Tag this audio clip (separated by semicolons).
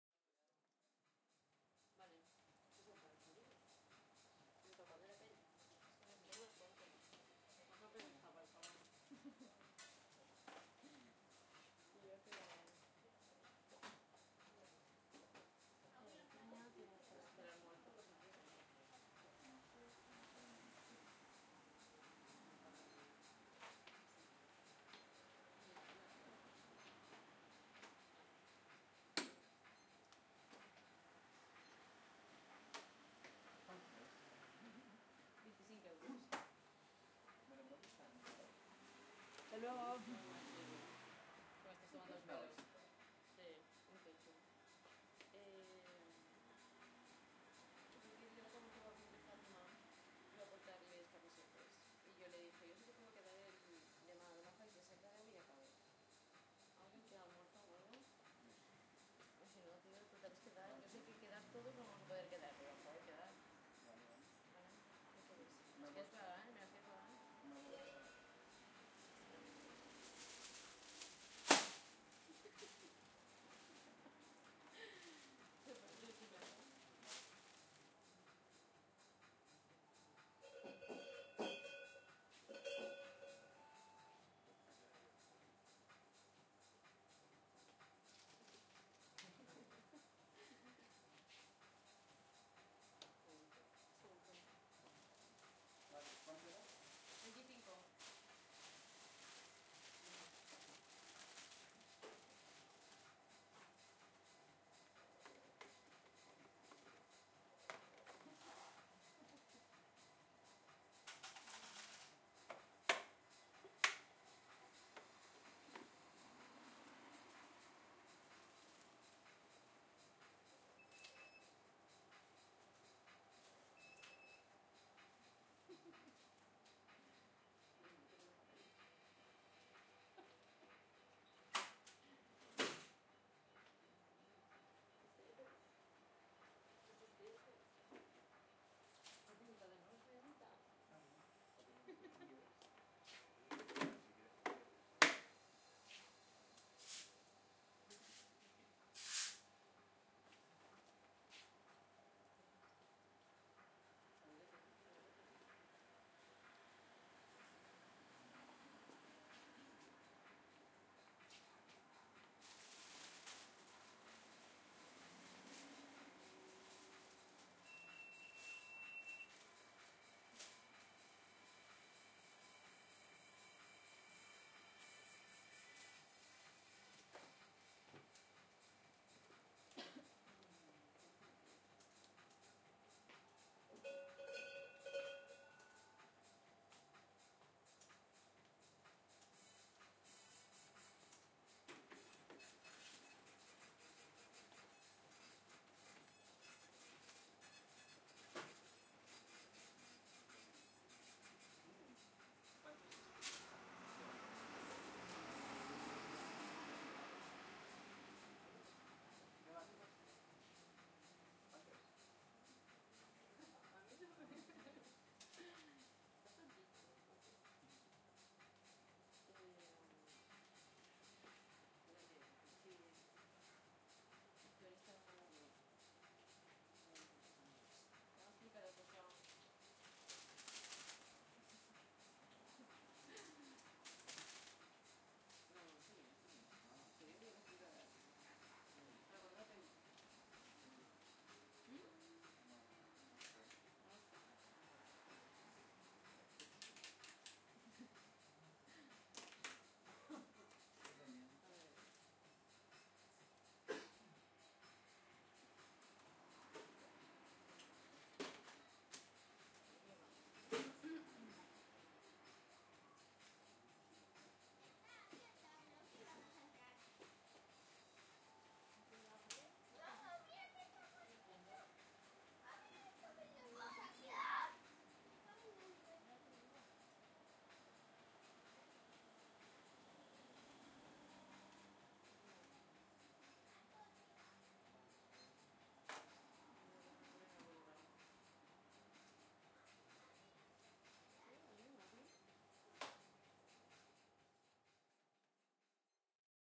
ale; beach; hop; market; souvenir; store